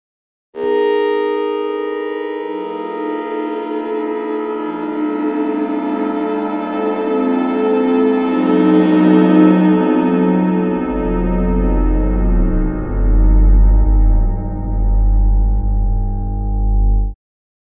horror ukulele
some individually recorded strums of chords on a soprano ukulele, arranged in a musical way, and then "accidentally" stretched way out and overlapped with one another. sounds pretty cool and scary to me!
chilling, digitally-manipulated, eerie, horror, scary, spooky, strumming, ukulele